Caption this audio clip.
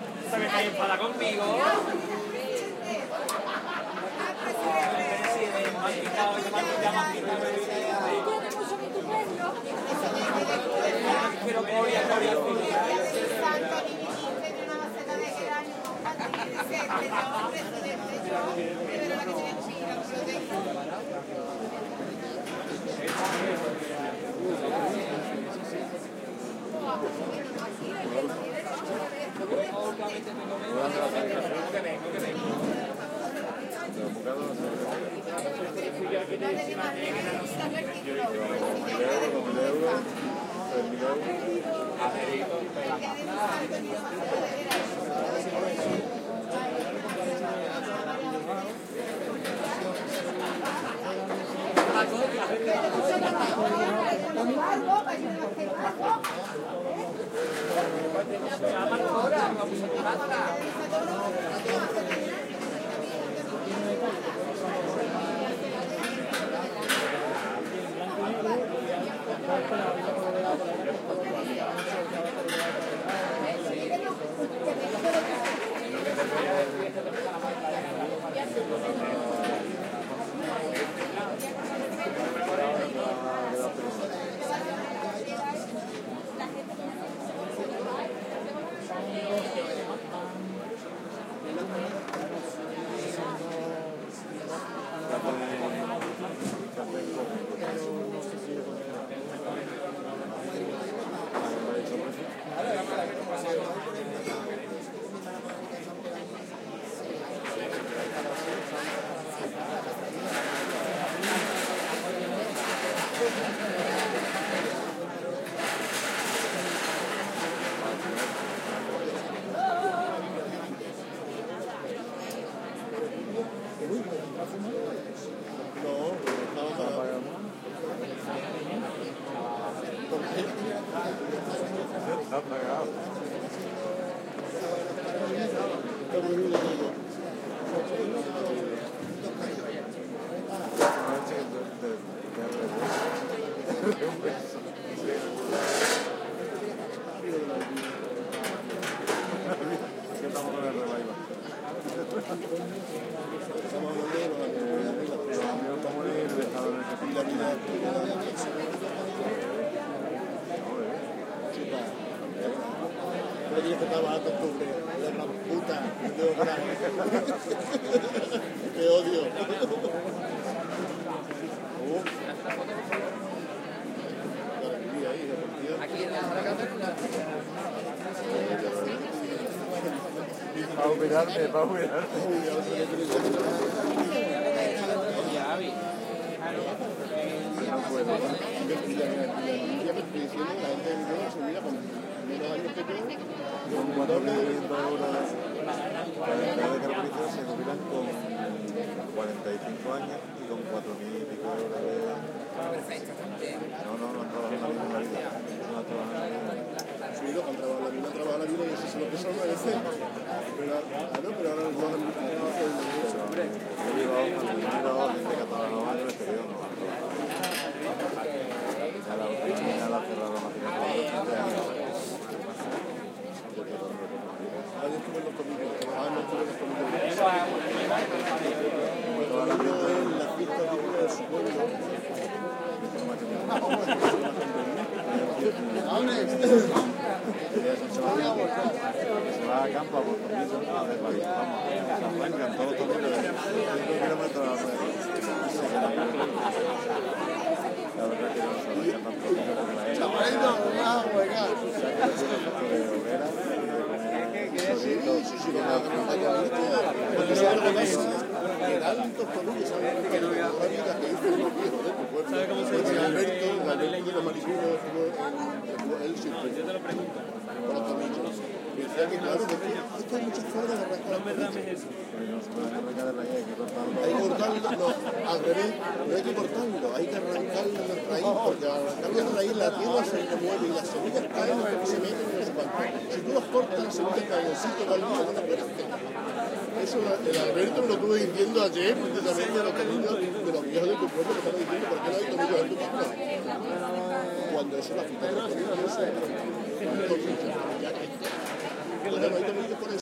20120121 outdoor drinking ambiance
People drink outdoor and talk, no music. PCM M10 recorder with internal mics. Recorded at Alameda de Hercules, Seville (S Spain)
ambiance, bar, drinking, field-recording, party, seville, spain, spanish, talk